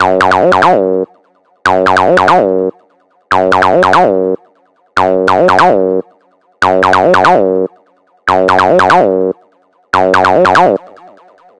acid riff
abl3, acid, tb303